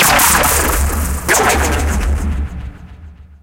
Viral Sea Sickness Fx